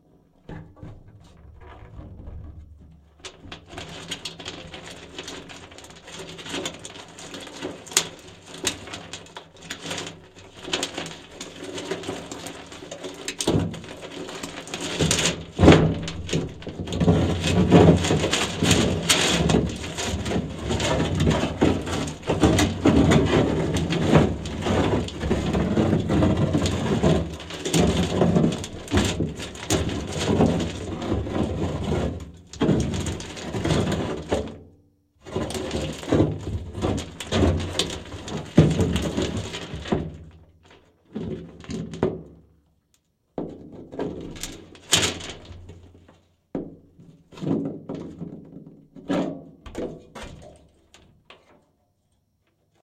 Contact mic recording on a large metal storage case. Rubbing a small, bumpy ball on the surface.